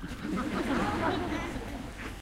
big crowd a little laughter 3
A big crowd of people laughing. Recorded with Sony HI-MD walkman MZ-NH1 minidisc recorder and a pair of binaural microphones.
crowd, laugh, laughing, laughter, outdoor, people